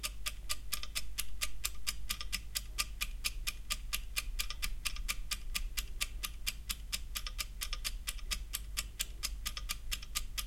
As requested: here is a test of some small condenser microphones.
For the test I used a Sony PCM-D50 recorder with the setting of 6 (only on the Soundman OKM II studio classic microphones was the setting on 7) and an egg timer, 15cm away from the microphones. These were spaced 90° from the timer (except the inside microphones of the Sony PCM-D50, which I had on the 90° setting.
Apart from the inside microphones of the the Sony PCM-D50 I used the AEVOX IM microphones and the Soundman OKM Studio classic, both of them binaural microphones, the Primo EM172 microphone capsuales and the Shure WL183 microphones.
Please check the title of the track, which one was used.
183, WL, timer, test, microphone